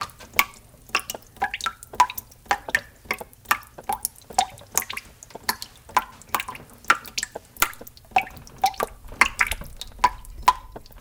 Water Driping 2
Shower Water Running Drip Toilet